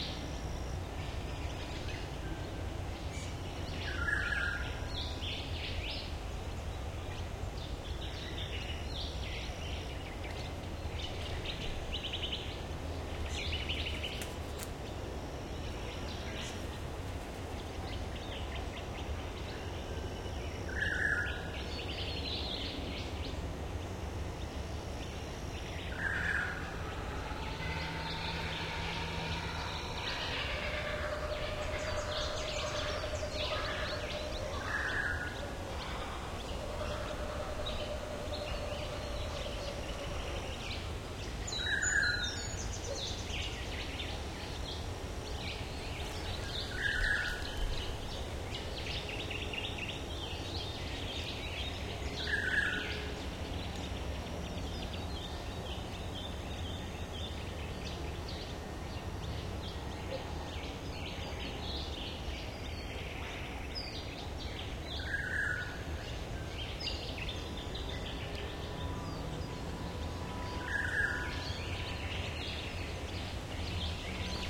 Bushland/Forest Distant Birds and Windy Trees
Recording of ambient birds and wind through the trees at Point Halloran, QLD Australia. This sample has muffled birds and some low rumble and tones from
Captured with a BP4025 microphone and ZOOM F6 floating-point recorder.
forest, nature, wind, birds, bush, ambient, trees, ambience